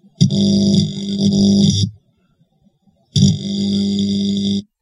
An Arc Welder, zapping.
arc-welder, electric, electric-shock, electricity, shock, zap, zapping